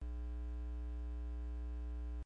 Static Aux (Original Noise)
Static, Sound Effect
analogic, electronic, connection, conexion, signal, digital, no, noise, static